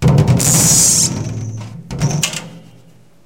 magnents bouncing on drums005
Sounds made by throwing to magnets together onto drums and in the air. Magnets thrown onto a tom tom, conga, djembe, bongos, and in to the air against themselves.
maganent-noises, percussion